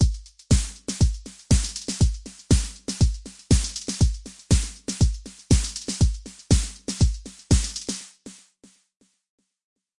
JOW 4 on floor drumloop 120bpm
drumloop, beat, electro, 120pbm